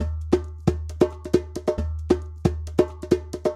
Djembe Loop 03 - 125 BPM
A djembe loop recorded with the sm57 microphone.
africa, ancident, djembe, drum, groove, percussion, remo, tribal